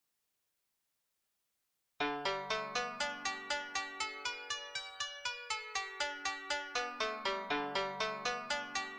Monochord - arpeggio V2
Homemade monochord tuned to a diatonic scale
Recorded using Reaper and Rode NT1000 microphone
arpeggio, diatonic, harmonic, medieval, melodic, monochord, pythagorus, relax, relaxing, scale, solfeggio, therapeutic, therapy, wooden